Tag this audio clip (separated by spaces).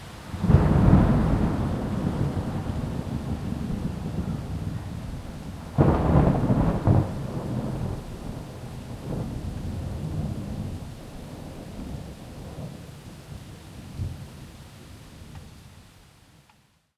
Thunder Lightning